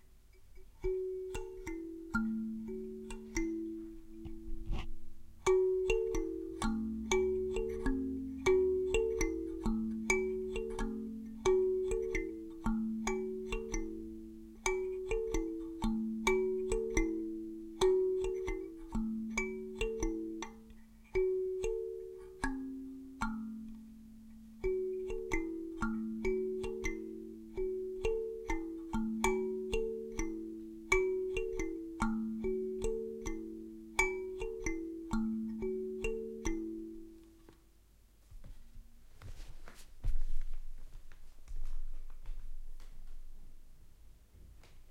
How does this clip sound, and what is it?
Kalimba african
record of african kalimba by Audiotechnica condeser microphone.
instrument, kalimba, percussion